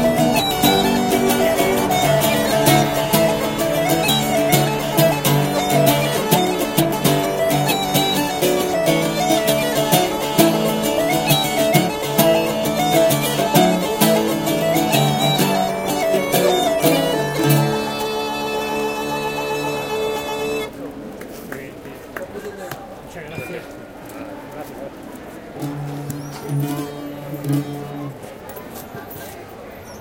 20071209.reel.ending
the ending of a traditional reel played in street performance by two guys at the uilleann pipes and bouzouki. As they finish you hear some street noise, shy clapping, and my voice thanking them.
uilleann-pipes, reel